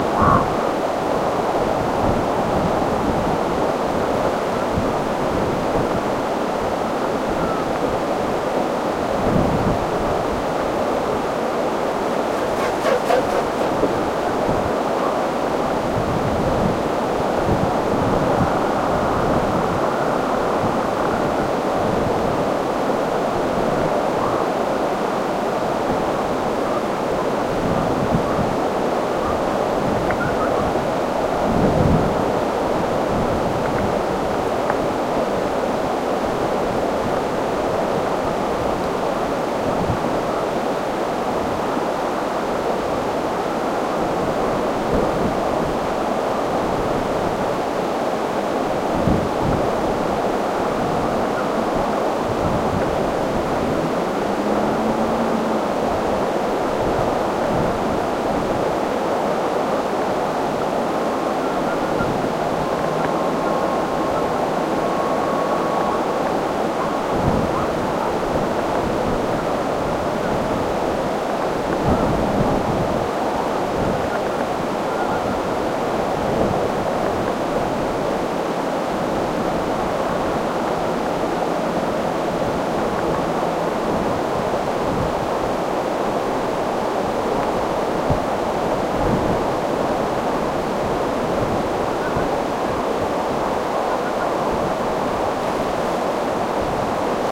air tone cold constant light wind +distant winter village sounds Quaqtaq, Nunavik1